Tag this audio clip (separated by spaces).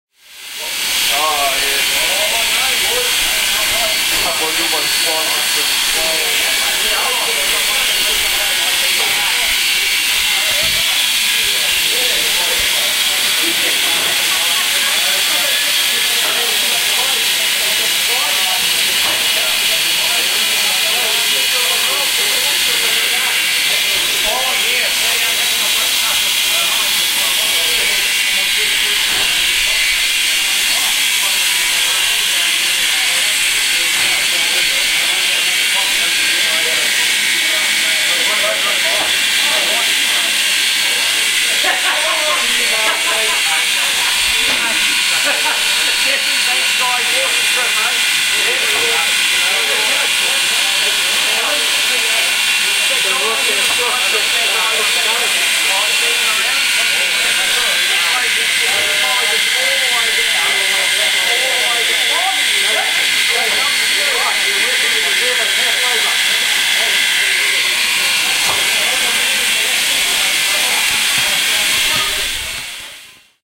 locomotive new-zealand steam-train